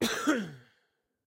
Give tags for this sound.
Cough
Sickness

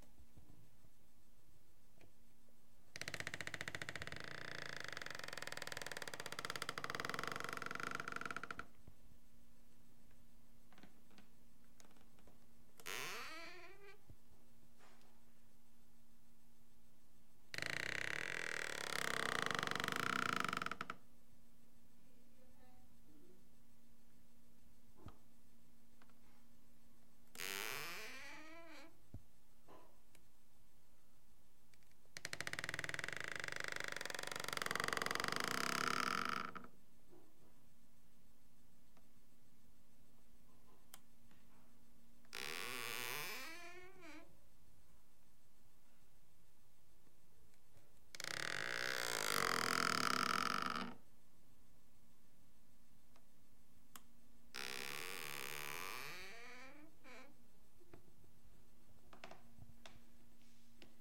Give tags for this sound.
creak
door
horror
scary
squeek

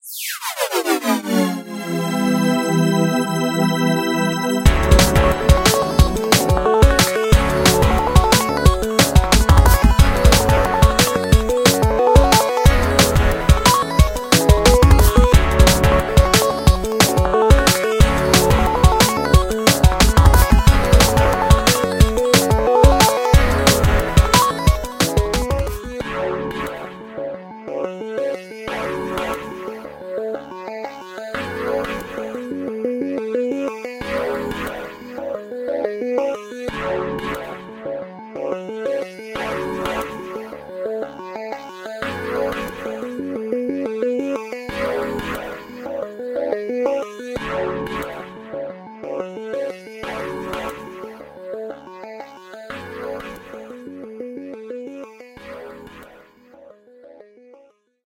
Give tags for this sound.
bumper
intro
kasa90
logo
podcast
radio

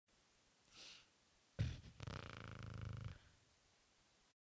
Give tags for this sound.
aaaa
asss
hasssss

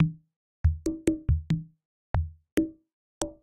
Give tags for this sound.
percussion
korgGadget
140bpm
loop

